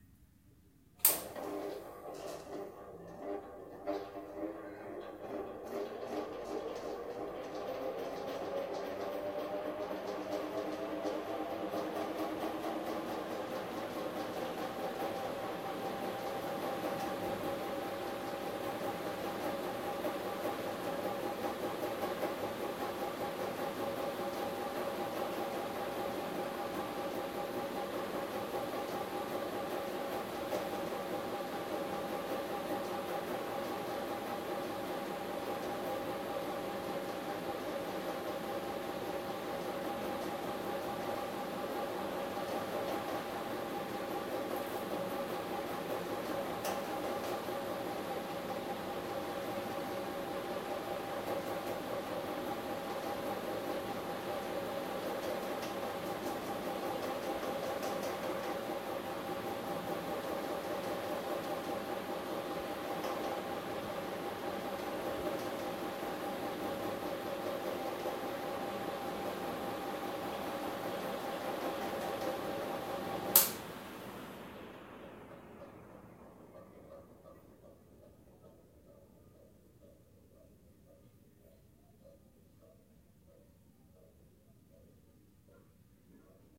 Old Noisy Ceiling Fan + RoomTone
This is the sound of an slightly old and noisy ceiling fan being switched on, run for a bit, and then turned off. It has been recorded on my cheap android phone in an empty room in Mumbai, India. There is some room tone hiss as well mixed in. You can loop the middle part to extend as the drone / rumble is quite steady.